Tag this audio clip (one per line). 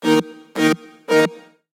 Music
Sample
Loop
Rave
Lead
Hardcore
Dance
Electronic
170-BPM
EDM